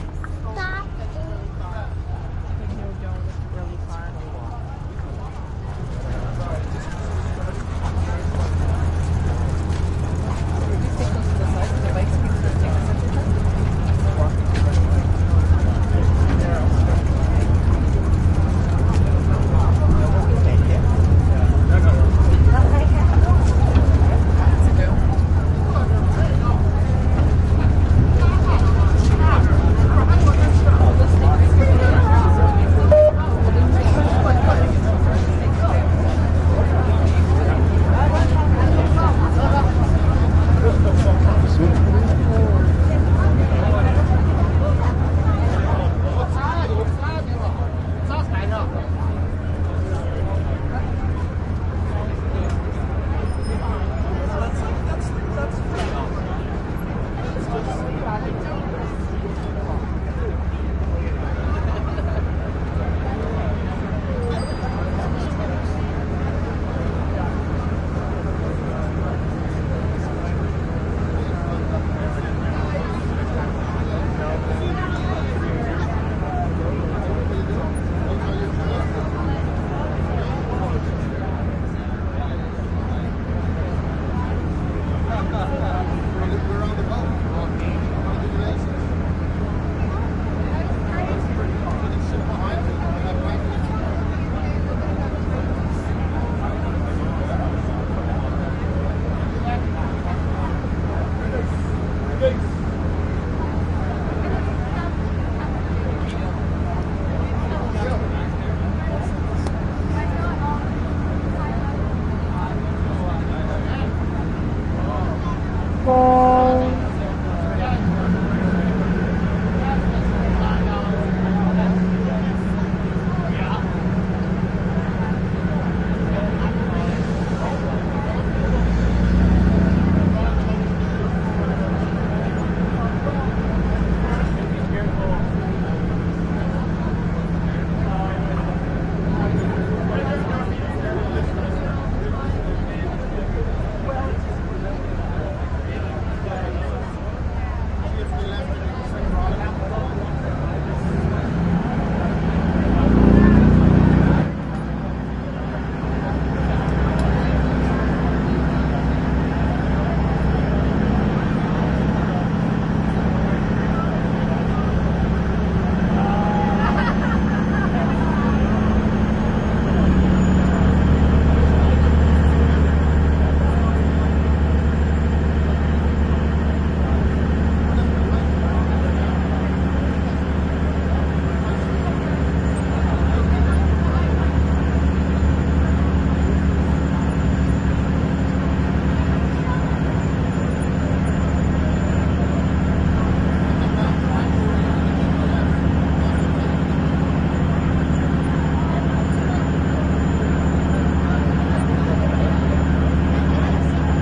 Bicycles Boarding Toronto Island Ferry Boat
(on the way to Ward's Island)
boat, boarding, bicycles, toronto, island, ferry